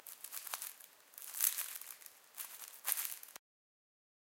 Content warning
game, sfx, straw